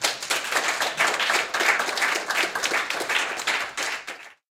Small applause (~30 people)
group, crowd, acclaim, clap, applauding, claps, cheer, applause, cheering, hand-clapping, clapping, audience, small, applaud